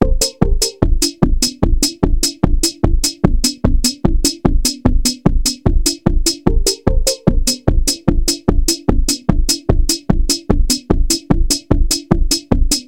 Minimal drumloop maybe like Hardtek Style !!